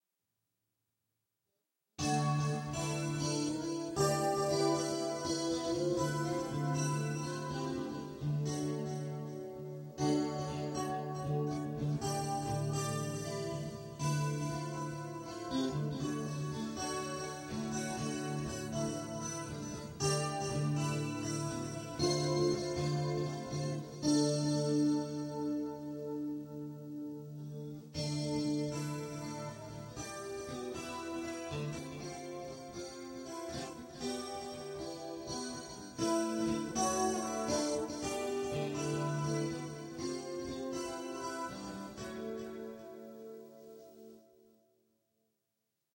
Soft guitar picking on a slightly processed Stratocaster.